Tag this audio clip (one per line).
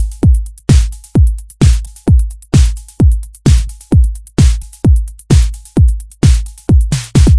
kick
electronic